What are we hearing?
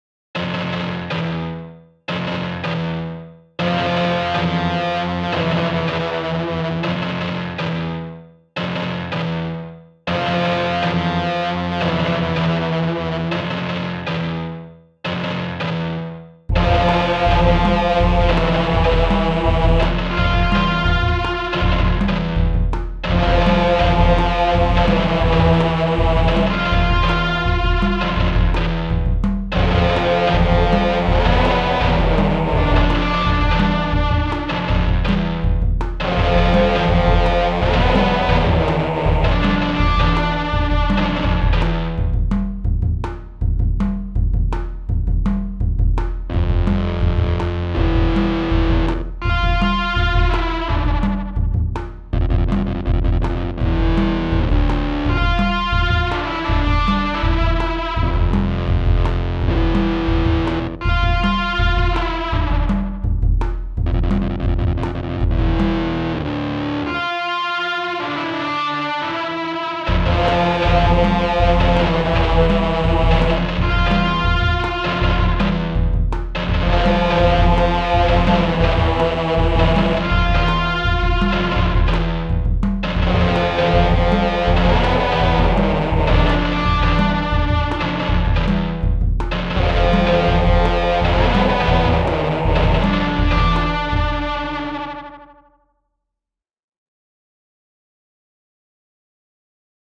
Tribal Sci-Fi
What was originally supposed to be a sci-fi track, ended up with a tribal drum loop which I couldn't remove, though might as well complete it. So this is the next entry, and temple run\boss-battle esque upload. Hope you enjoy.
As always, I offer free edits to my tracks and open for commissions.
predator, choir, drums, combat, guitar, army, chorus, Scifi, heavy, industrial, run, alien, action, boss-music, horror, music, jungle, boss, suspense, medieval, war, loop, battle, Tribal, danger, Sci-Fi, adventure, metal, explore, thriller